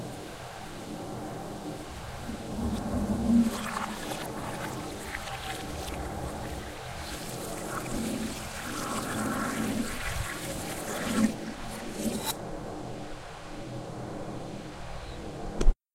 flushing toilet sound reversed with background noise of fan and phaser effect